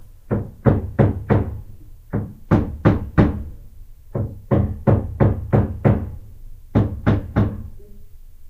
striking a nail to a wall 01
wall, hammer, wood, knock, nail, hit, impact, strike